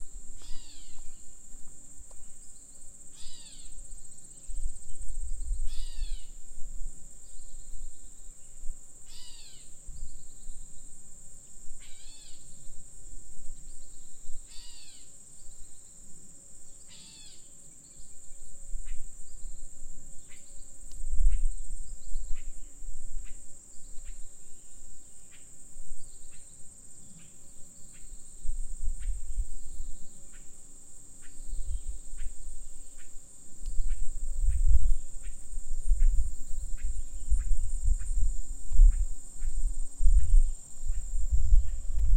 weird bird
an unidentified bird squacking with ambient crickets. field recording from rural wisconsin
field-recording, crickets, nature, bird, birds